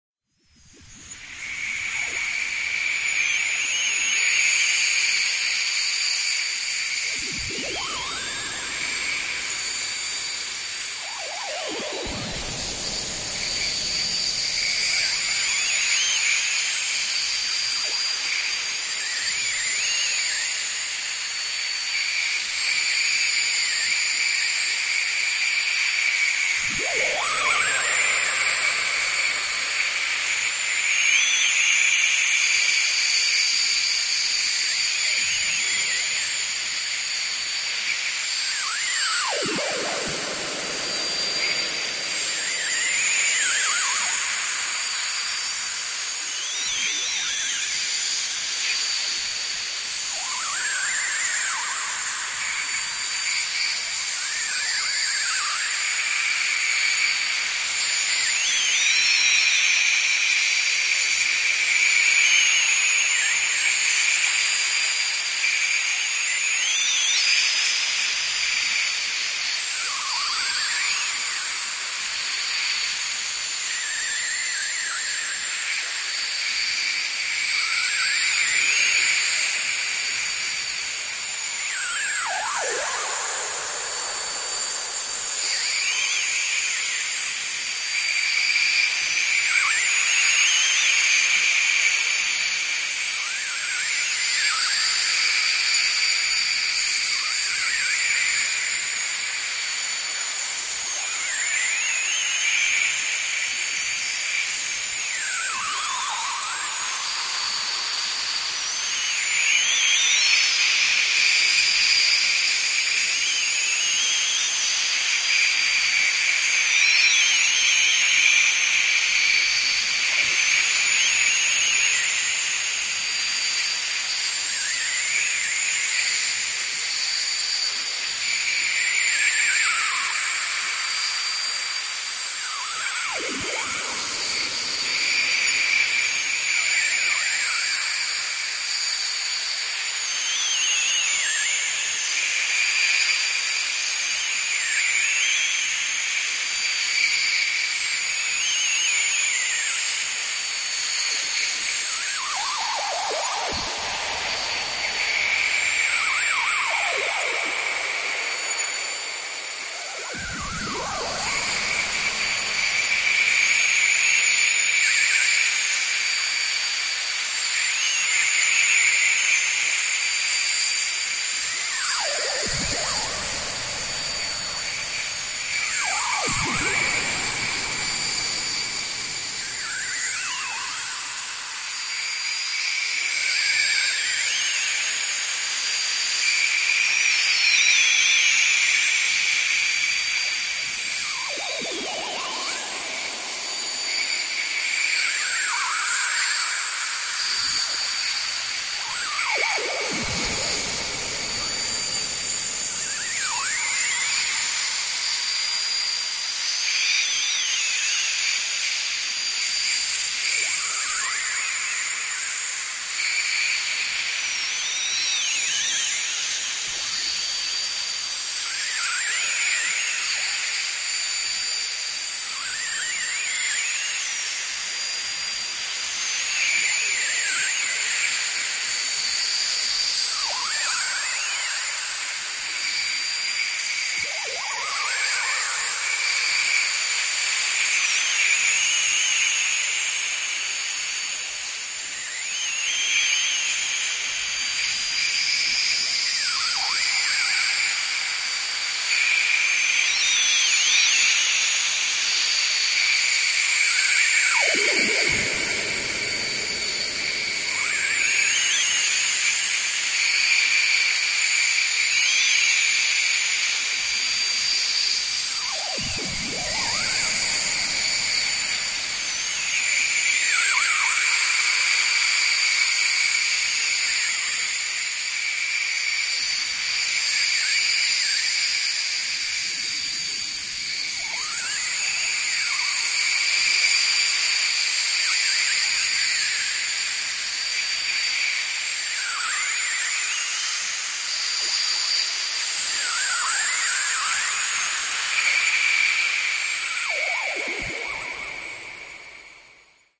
Space Drone 11
This sample is part of the "Space Drone 2" sample pack. 5 minutes of pure ambient space drone. Whistling birds with added space effects.
space, soundscape, ambient, reaktor, drone